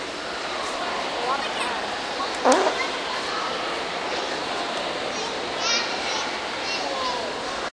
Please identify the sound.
washington naturalhistory fart
A brilliant historical sound of flatulence captured with DS-40 and edited in Wavosaur.
road-trip, summer, travel